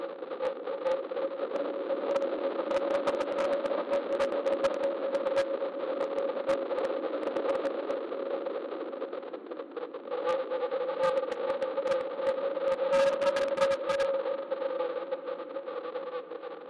Distorted Broken Radio SFX
"Hello...?" I think this radio has been broken for some time. Nothing but the static of the void can be heard through it now...
Created in FL Studio from a single base wind sample and a few VST filters.
Audio, Distorted, Distortion, Horror, Radio, Scary, SFX, Sound-Design, Walkie-Talkie